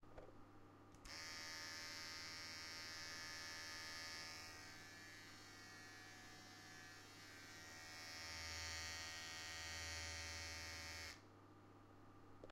Here i recorded an electric shaver going on and off.
electric-shaver, bathroom, shaver